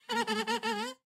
A small monster voice
beast, beasts, creature, creatures, creepy, growl, growls, horror, monster, noises, processed, scary